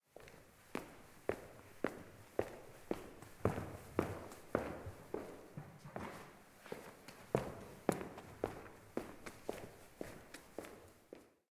Steps Parquet And Concrete
And, Concrete, Parquet, Slow, Sneaker, Steps